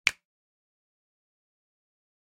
Real Snap 27
Some real snaps I recorded with an SM7B. Raw and fairly unedited. (Some gain compression used to boost the mid frequencies.) Great for layering on top of each other! -EG
finger, finger-snaps, percussion, real-snap, sample, simple, snap, snaps, snap-samples